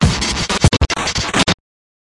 Pump 6 Glitch 3
Do you like Noisy Stuff ( No Para Espanol)
Breaks